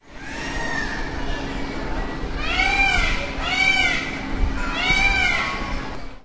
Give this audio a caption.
Pavo real 01
Typical call of a male Peafowl (Pavo real, scientific name: Pavo cristatus), and ambient sounds of the zoo.